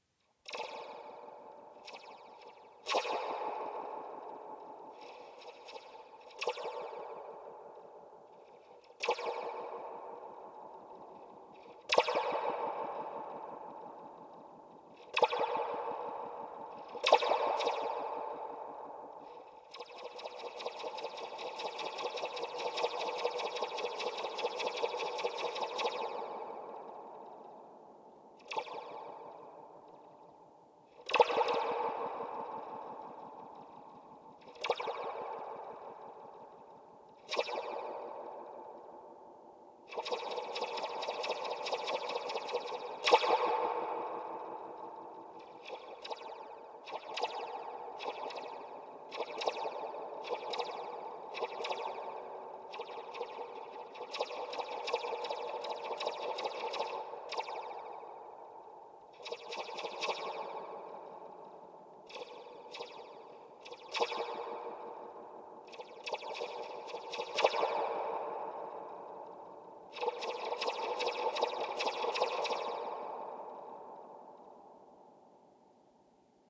zubetube2-plucking
I have an old toy called a Zube Tube which is a cardboard tube with a plastic cup in each end and a long spring stretched between the cups. When you shake the tube it makes the weirdest sounds! In this recording I am reaching through a hole in the side of the tube and plucking the spring with my finger.
tube, sci-fi, sound-effect, weird, spring